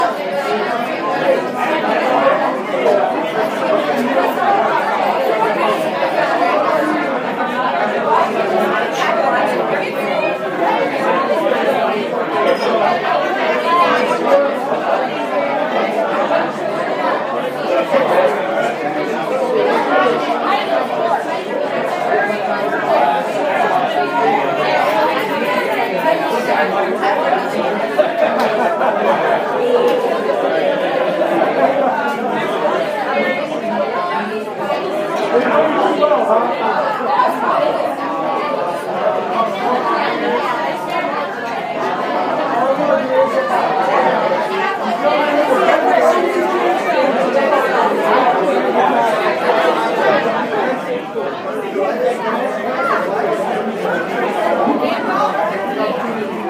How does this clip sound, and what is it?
crowd indoors bar
Crowd of people indoors in a bar. No background music.
bar, crowd, indoors, people, voices